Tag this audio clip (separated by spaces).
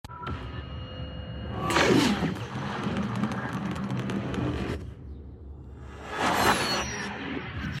digital warp tech